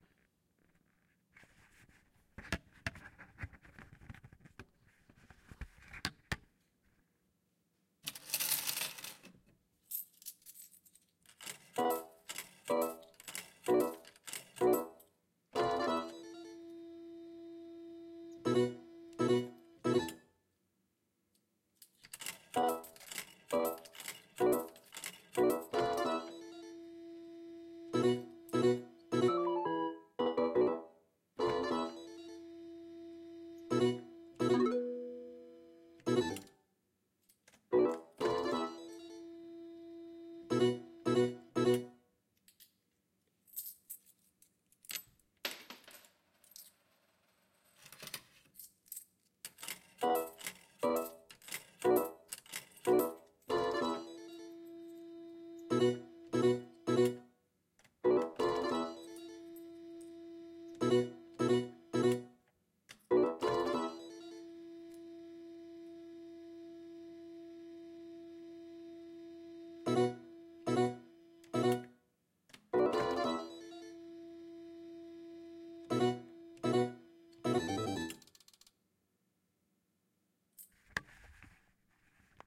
General slot play on Japanese Slot Machine. Tascam D-100
Slot, Yamasa